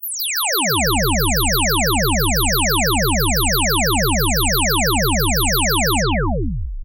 I have finally started building a saucer-shaped UFO. Unlike other designers, I've build the sound that it should make first, using harmonics derived from the Mayan calender and the distances between the pyramids and the Angkor temples (well, I've still got to work out the mathematics, but I'm sure I'll find a way to make it all match). Anyway, listening to these sounds for too long will get you abducted by aliens in the near future. Or you'll wake up in the middle of the night, running circles in the corn or doing weird things to cattle. This is the sound of my UFO directing gravity waves downwards, so it's going UP.